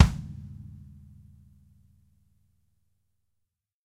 cSonor Kick1 R06
cSonor Kick
Head: 1
Mic: R
Pressure: 6 of 6
The Sonor Kick Set includes many acoustic field recording samples of a Sonor Kick drum. The recordings are separated by categories denoted by a number and letter according to drum head & microphone position, including different volumes/velocities. Here is a key to help you understand:
cSonor Kick[#] [Pan][Pressure]
[#]: Which drum head used.
[Pan]: Which side the microphone were partial to.
[Pressure]: The velocity/hardness the drum was hit at (ascending in intensity)
By providing the different heads, mic positions, and intensities, the Sonor Kick Set has everything you will ever need to get authentic kick drum samples. Enjoy!
01, 1, acoustic, bass, csonor, different, drum, drums, hardness, hit, kick, pressure, sonor, thud